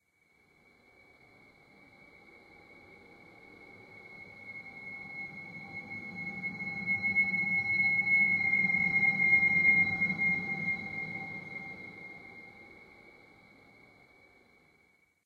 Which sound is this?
Subliminal Scream
Atmospheric horror noise somewhat reminiscent of a scream.
Evil, Scream, Freaky, Halloween, Atmosphere, Horror, Terror, Scary